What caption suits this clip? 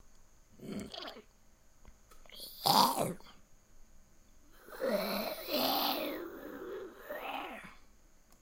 A very short sequence of zombie noises made in audacity created for The Lingering video game that's in development. Be sure to follow to get the rest.